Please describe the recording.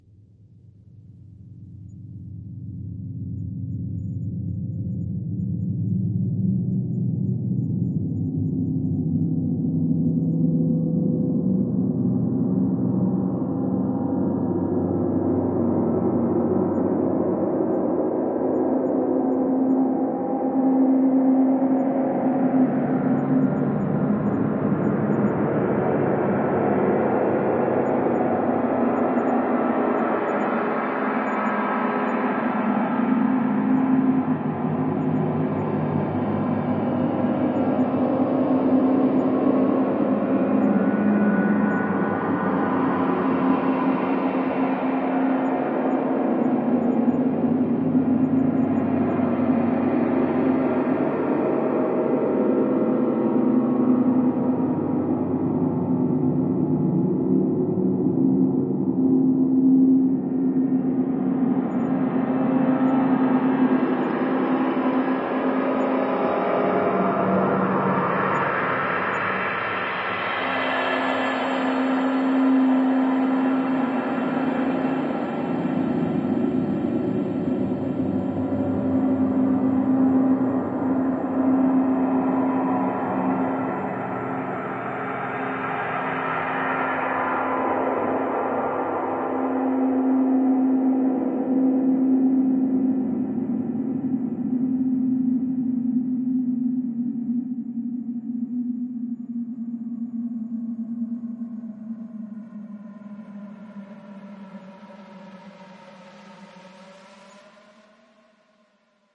From an unsuitable recording to a soundscape in Audition. Reverberations, echoes, distortions, expansion & compression, reversions, dynamic EQs, slices… OMG.